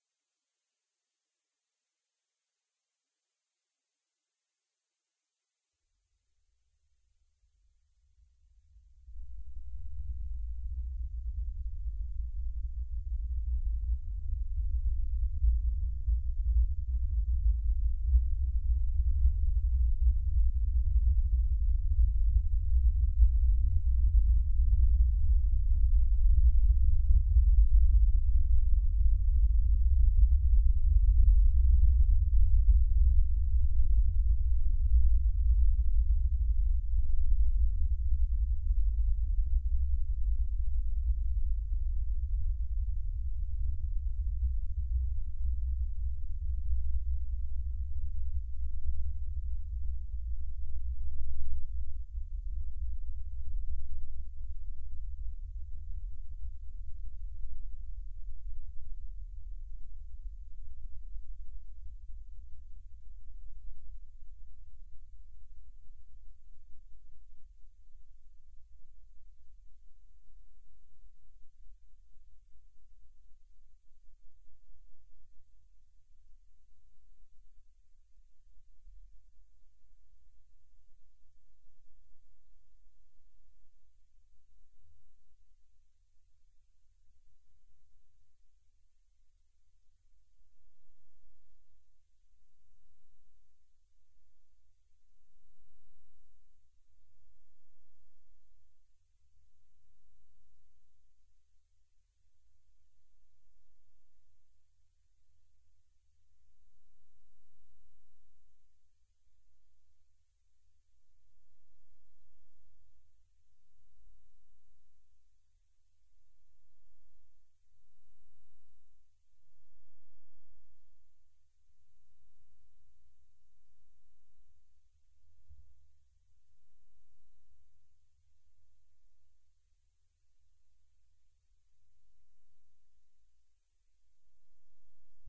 I don't remember making this, but I'm pretty sure all I did was run some white noise through bassboost a couple times. It works for earthquakes, distant tiger roars, and other subsonic sounds.
bass, disaster